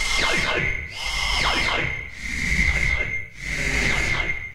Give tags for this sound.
industrial; loop; machine; machinery; mechanical; noise; robot; robotic

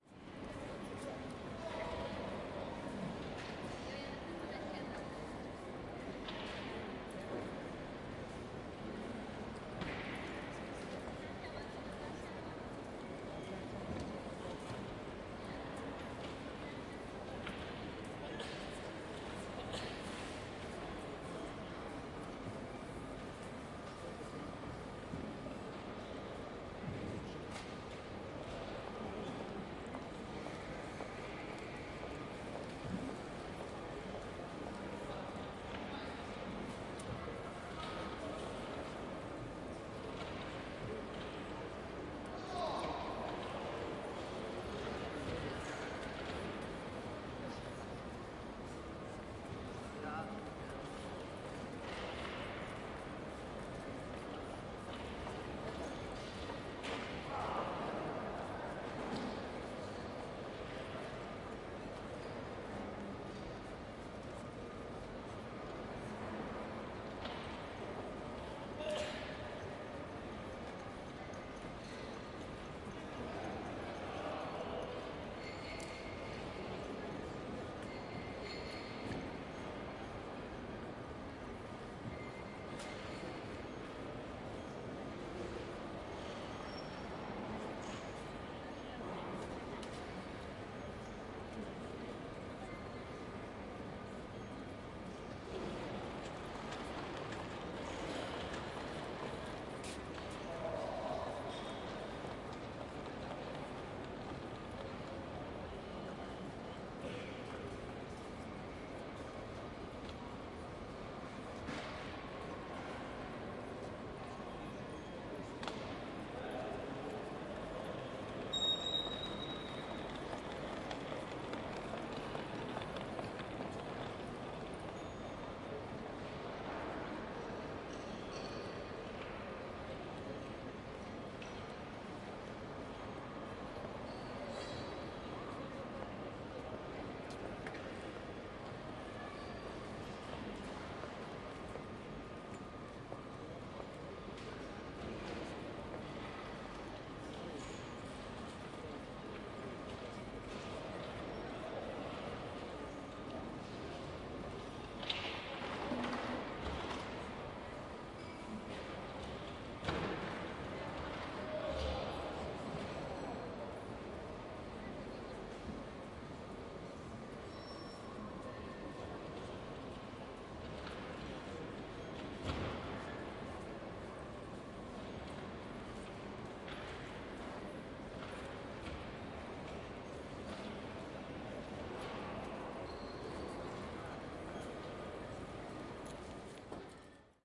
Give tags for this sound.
people; voices; steps; lobby; crowd; flight; airport; field-recording

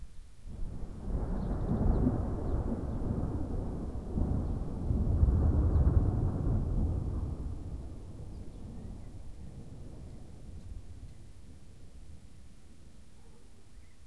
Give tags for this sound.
thunderstorm; thunder; storm; weather; lightning

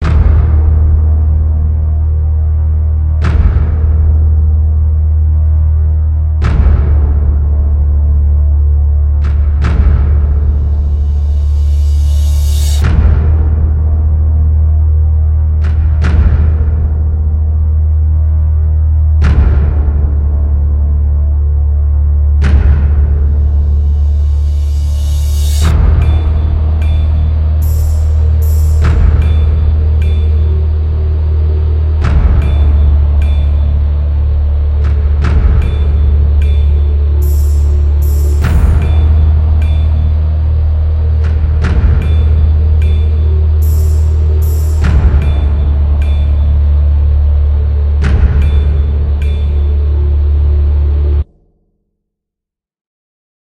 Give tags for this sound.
Evil; Low; Spooky

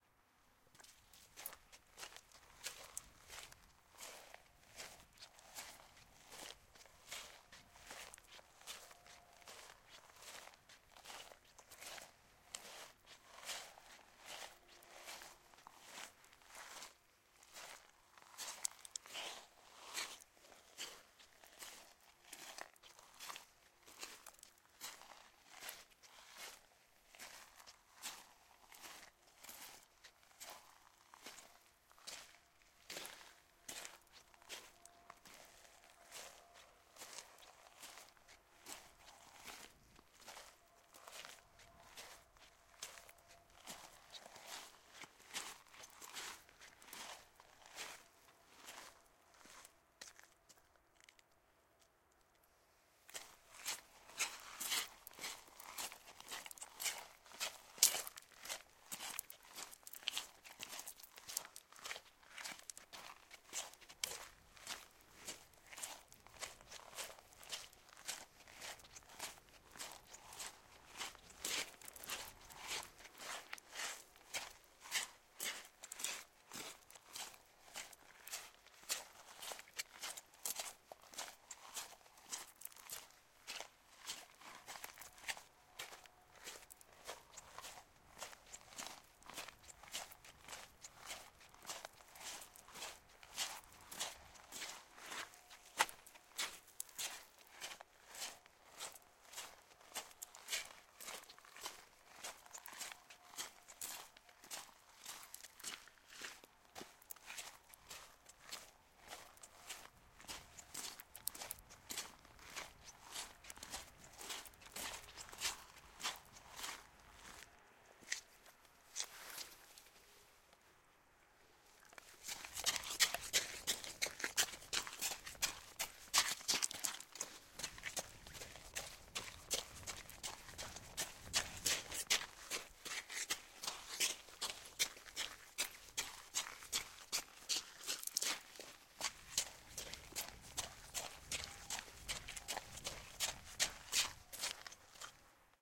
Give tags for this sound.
stones; sand; slow; walk; run; gravel; fast; rocks

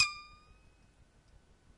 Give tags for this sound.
experimental; metallic

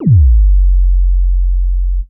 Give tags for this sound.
bass; deep; drum; percussion; sine; sound-design; sub

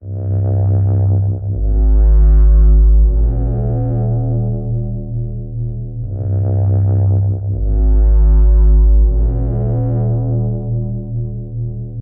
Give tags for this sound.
heavy; big; bassline; bass; sample

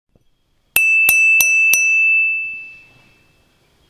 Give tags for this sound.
Bell; Glass

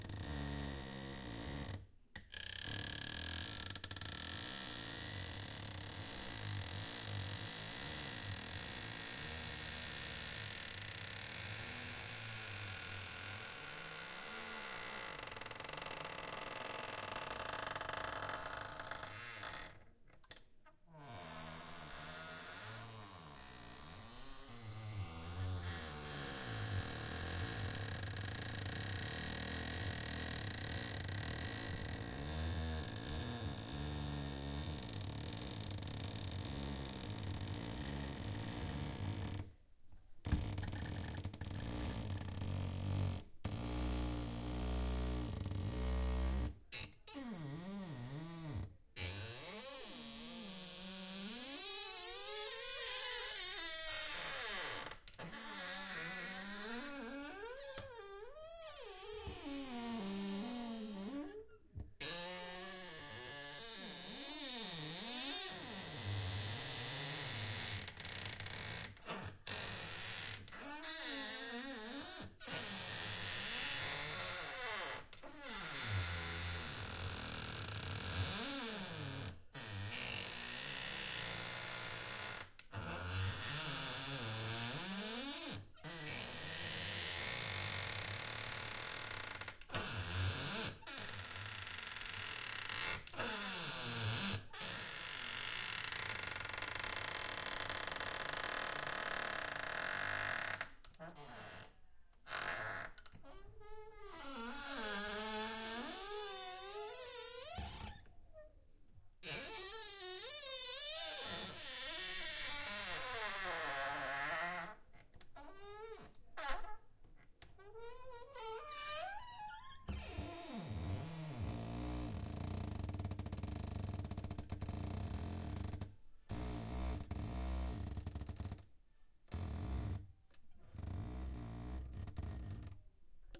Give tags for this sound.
close; closing; clunk; creak; creaky; discordant; door; gate; handle; open; squeak; wooden

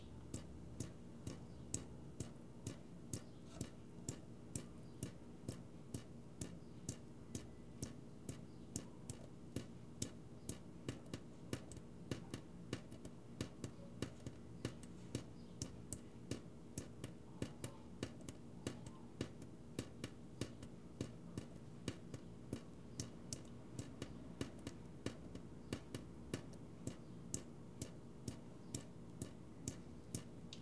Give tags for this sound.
from; gotas; water; droppin; faucet; grifo; agua

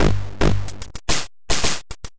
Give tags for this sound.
bertill; crushed; destroyed; drums; free; needle; pin

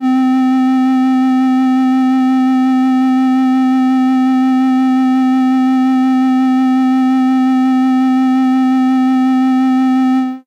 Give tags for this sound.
flute; vl-tone; sample; human; vl-1; casio; vintage; retro; synth; league

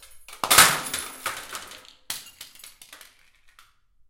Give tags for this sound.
Falling; bang; over; crash; Ladder

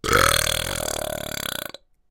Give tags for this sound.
belch; burp

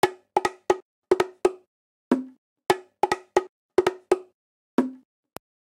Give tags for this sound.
samples; tribal; Unorthodox; bongo; congatronics; loops

